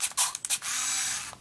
MinoltaV300Picture6
Click! I take a picture with a Minolta Vectis-300 APS film camera. Clicking of the shutter and then the film winds. There are several different sounds in this series, some clicks, some zoom noises.